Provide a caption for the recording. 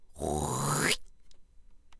NPX Throat Gathering Spit
breath, Inhale, male, man, mouth, Nasal, tascam, unprocessed, voice